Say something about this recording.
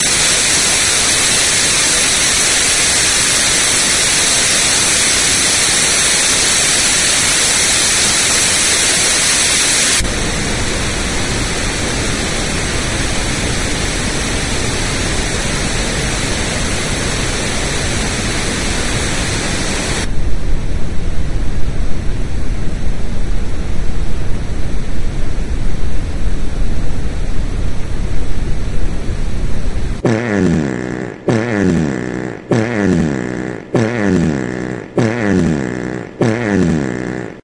tv channel noise 3
noise, pink